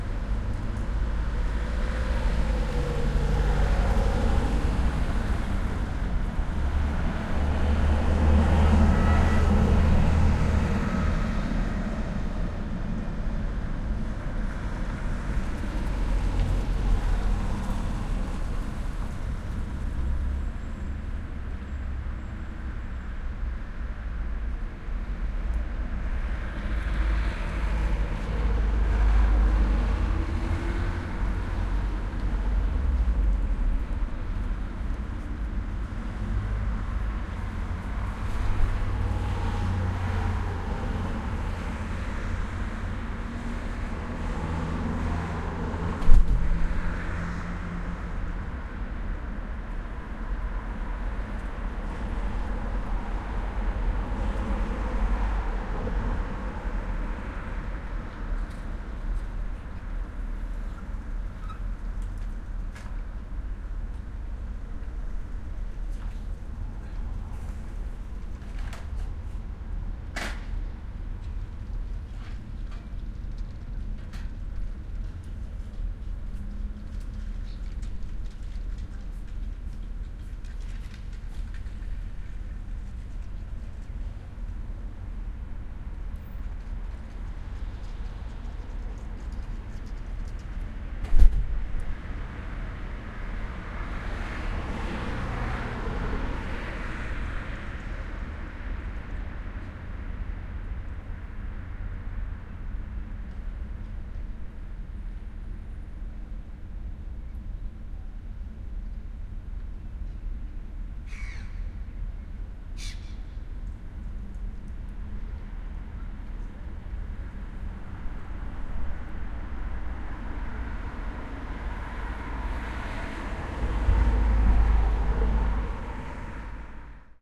light sunday city traffic
Recorded in Esbjerg, Denmark, on a Sunday afternoon. Not much traffic. Just a few cars and a bus is passing. Sony HI-MD walkman MZ-NH1 minidisc recorder and two Shure WL183